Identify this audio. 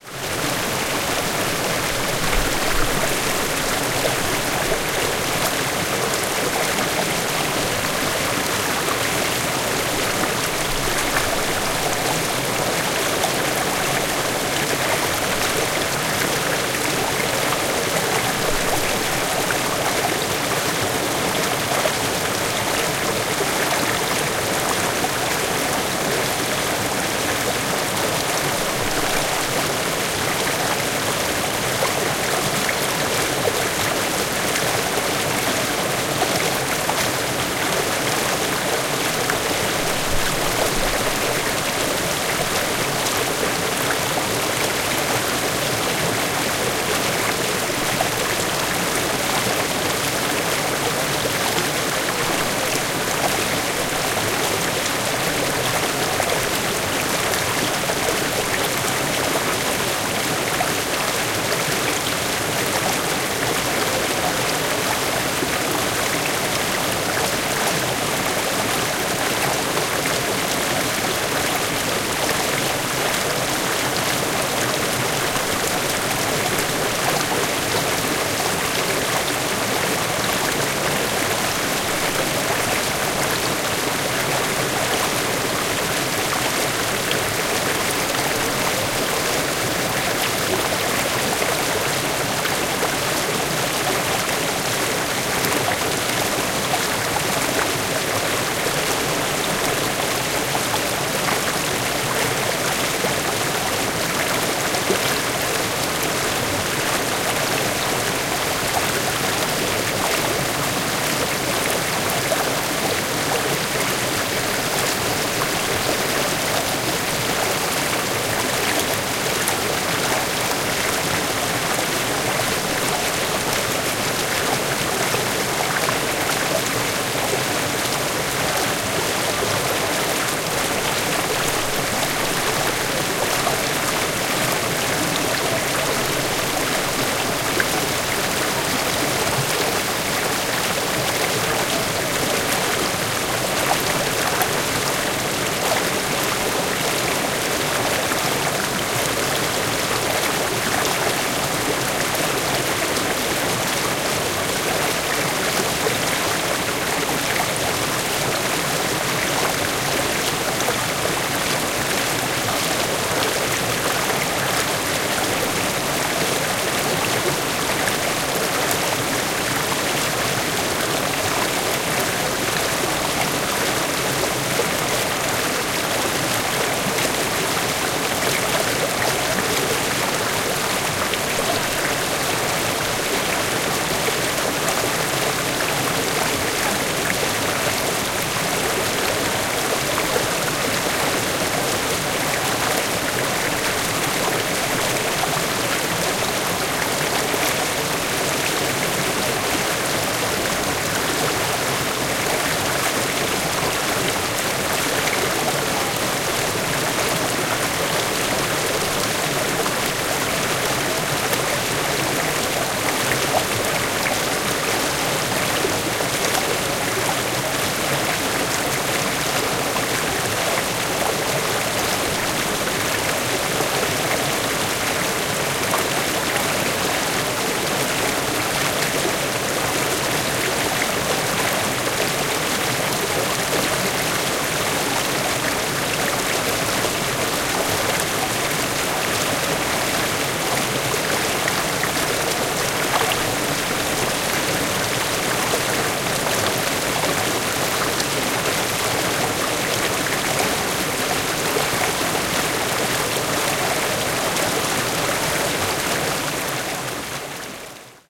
Waterfall recorded close to the water.
tangara, bird, belo-horizonte, morning, river, cachoeiras, nature, rural, minas-gerais, brasil, field-recording, stream, rio-acima, birds, water, forest, brazil, countryside, waterfall